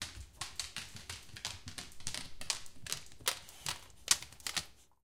dog - walking - towards
A dog walking towards me on a vinyl floor.